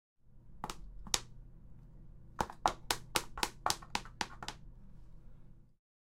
42-pasos tacones
A high heel shoes walking sound
heel, high, shoes